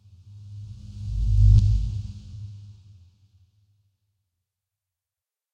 amber bass 03, incoming
i worked out these 3 variations of the same bass sample, all very deep low frequency. should be suitable for minimal techno or ambient, and it is useless for small amps, because sound is of very low freq.
bass-ambient, clean-bass, low-bass, nice-bass, sub-bass